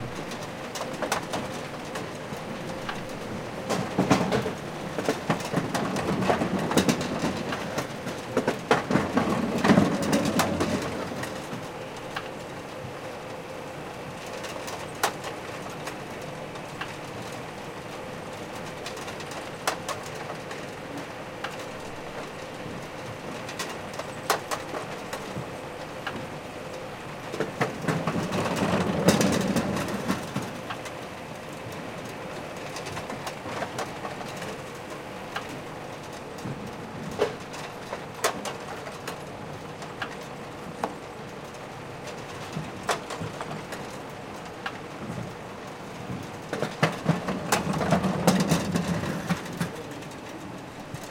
This is a montage of three layers of monaural shotgun recordings made with a Sanken CS3e on a Roland R-26. The recordings were made in a distribution center of Honda parts in Ghent harbour on 15th of january 2015. The montage was done in Reaper.